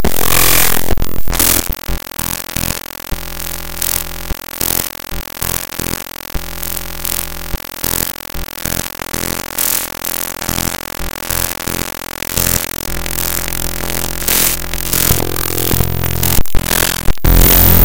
A screen capture of the paint window imported raw into audacity.
bit, bitmap-image, data, map, pic, picture